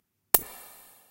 Shell Casing 4
Various Gun effects I created using:
different Snare drums and floor toms
Light Switch for trigger click
throwing coins into a bowl recorded with a contact mic for shell casings
casing, guns, shell